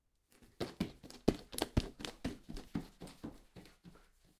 7-1 slower stamping
cz, czech, panska, slower, stamping